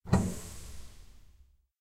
Train air release between cars - hit
compressed air released from train